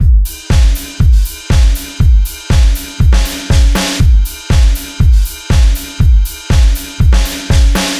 Electronic rock - Red-ox P4 Rhythm drum 03.Mixed, compressed & limited.